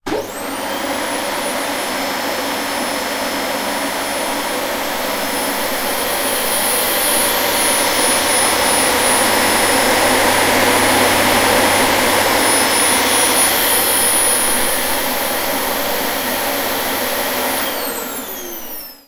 Simply a recording of my Dyson hoover. Enjoy :)
Dyson, Hoover